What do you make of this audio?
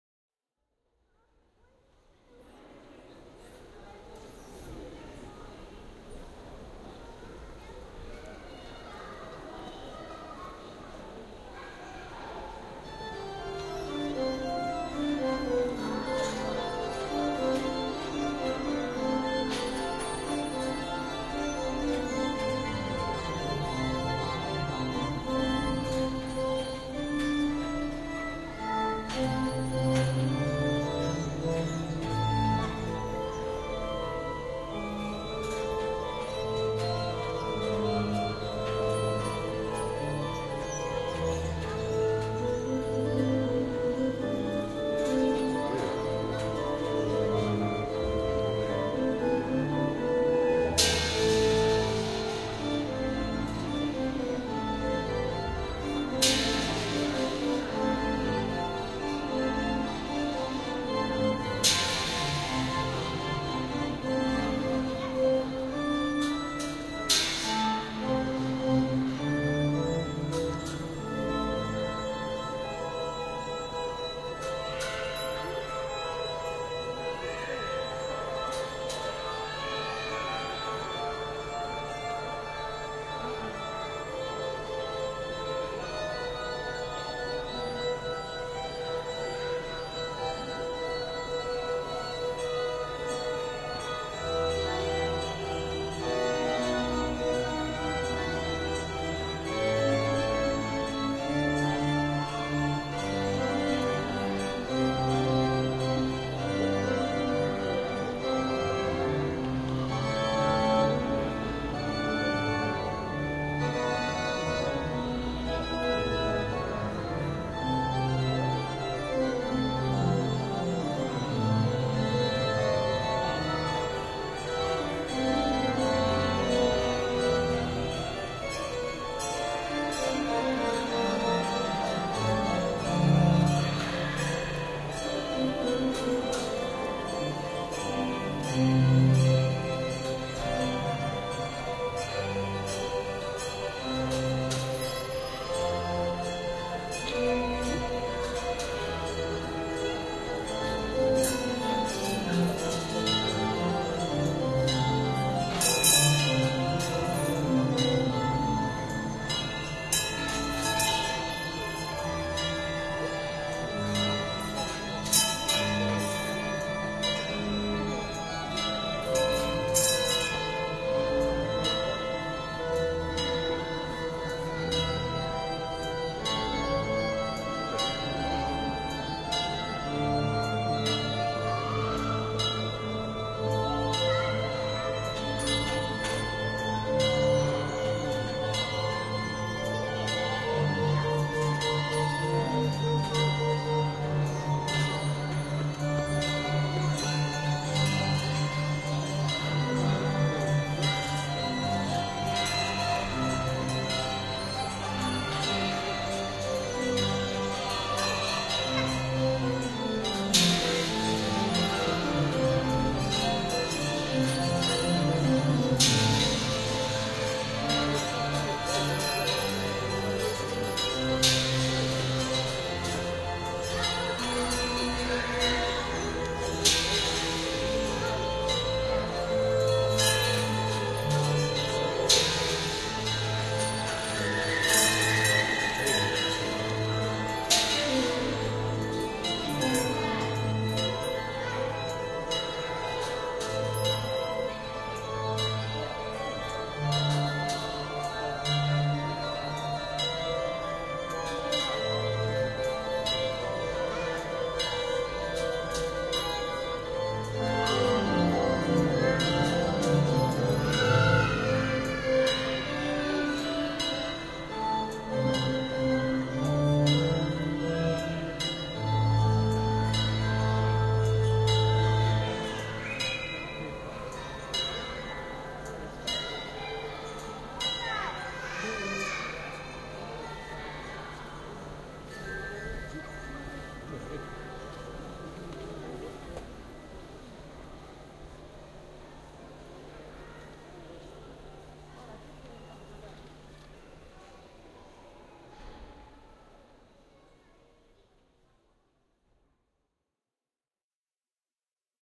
The Sound of the Millennium Clock in the National Museum of Scotland, Edinburgh.
Recorded on a Sharp Mini disc recorder and an Audio Technica ART25 Stereo Mic